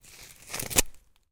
scratch-wood01
Scratching a piece of wood.